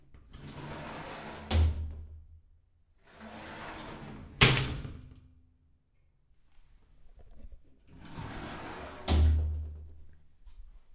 shower door glass slide open close rattle
close, door, glass, open, rattle, shower, slide